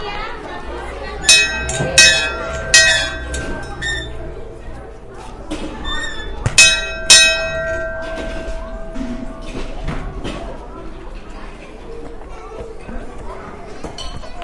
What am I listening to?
old bell Sint-Laurens Belgium
Recording of the old schoolbell at Sint-Laurens school in Sint-Kruis-Winkel, Belgium.